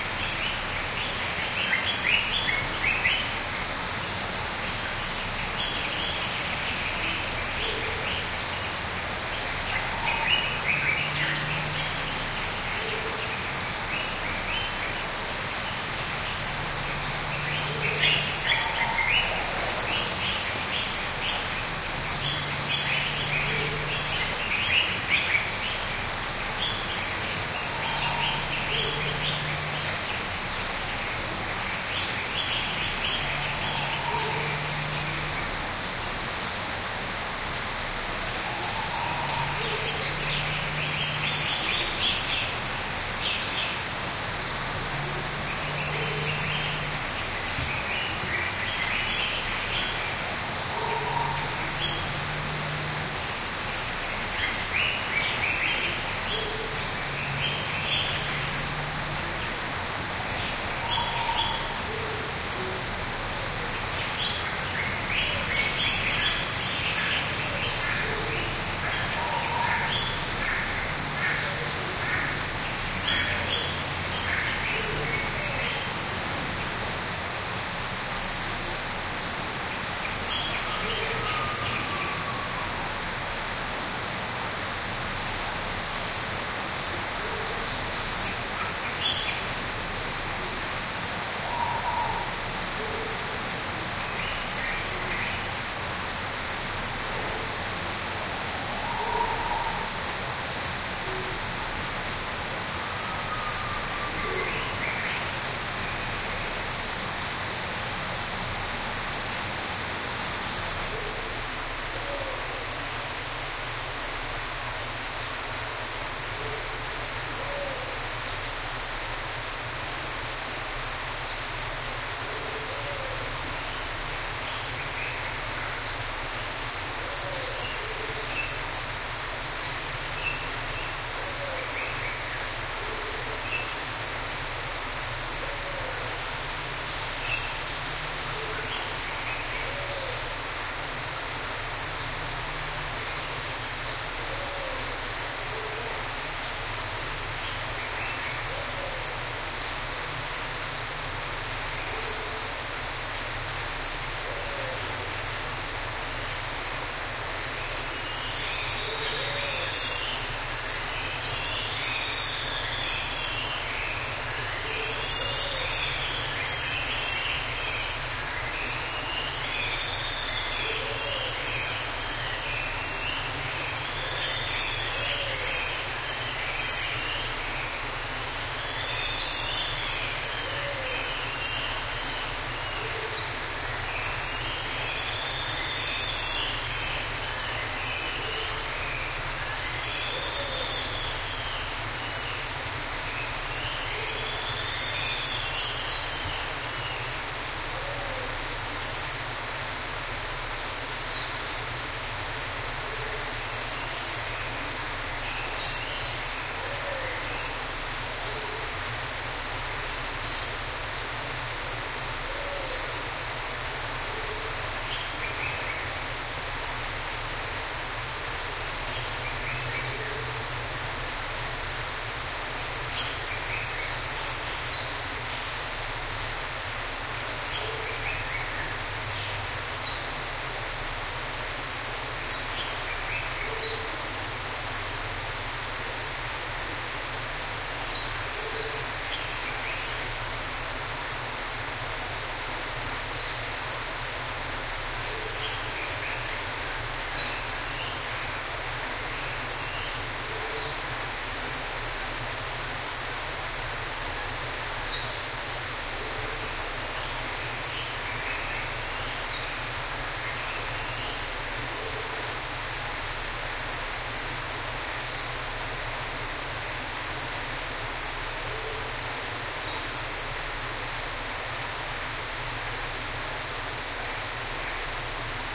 Recorded inside of the Jungle building at the Sedgwick County Zoo. Known birds include: Common Bulbul, Violet Turaco, Beautiful Fruit-dove, Victoria Crowned Pigeon, Golden-breasted Starling, White-bellied Go-away-bird, Pheasant Pigeon, Bridled White-eye, Blue-gray Tanager and Oriole Warbler. There is a waterfall as well. Recorded with an Edirol R-09HR.